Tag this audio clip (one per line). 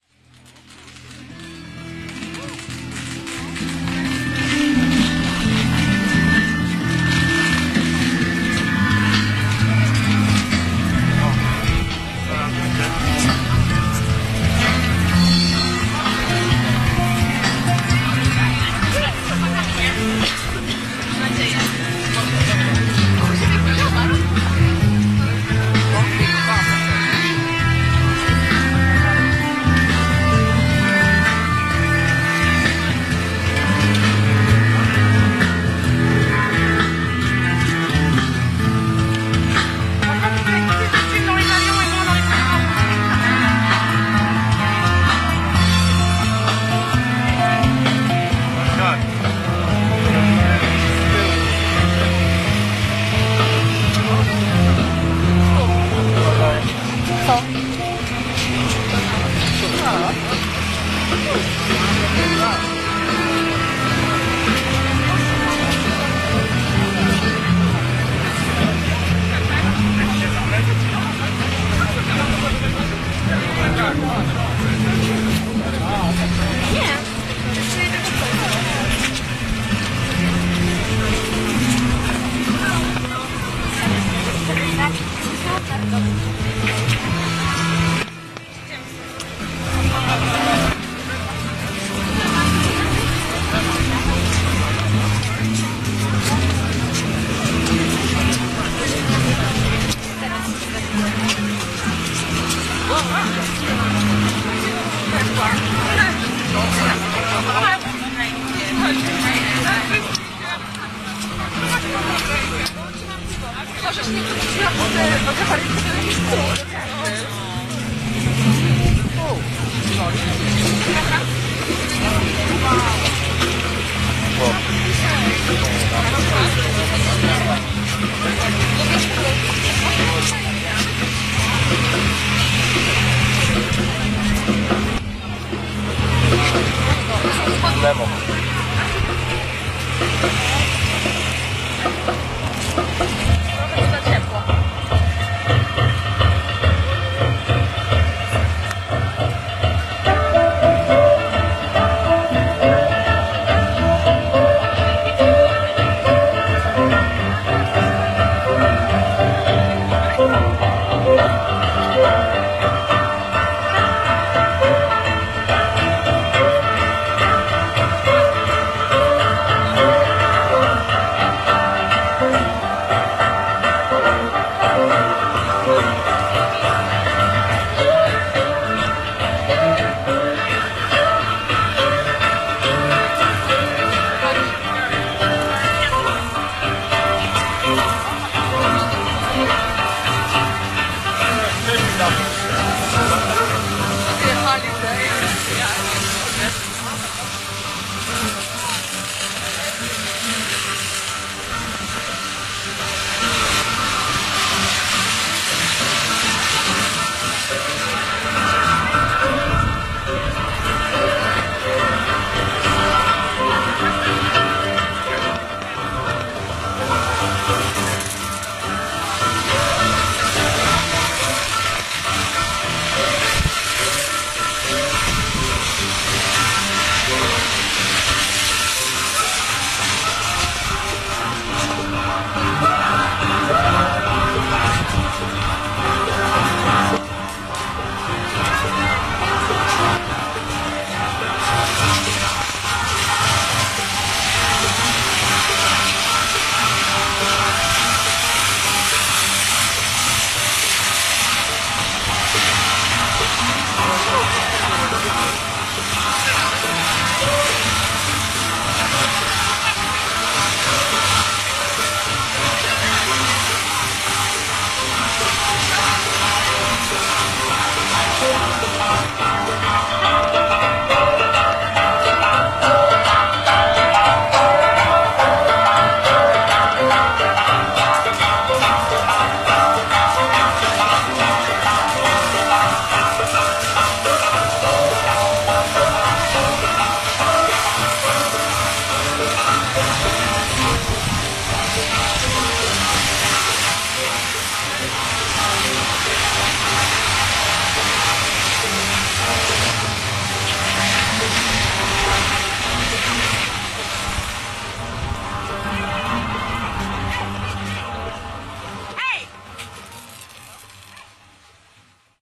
crowd
fireworks
performance
karnavires
malta
festival
poznan
theatre
poland